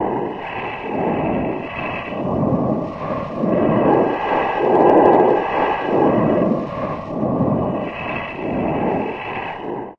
It's like a storm, but a freaky one! Made in the Amber program with some now unrecognisable nice sounds of the hang musical instrument. Phasing effect added with Audacity.
fx, industrial, jet-engine, starship-engine, storm, urban